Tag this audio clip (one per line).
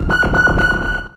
multisample
one-shot